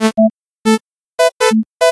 I created 6 sounds (220Hz ; 440Hz ; 220Hz; 440Hz; 660Hz; 500Hz; 200Hz), mixed them all, faded in and out in order to create a loop.
Ce son est itératif et varié (V"). C’est un groupe nodal, le timbre est brillant. Le grain est plutôt lisse, l’attaque un peu forte. La variation des hauteurs est scalaire et le profil de masse est calibré, tout étant à la même hauteur.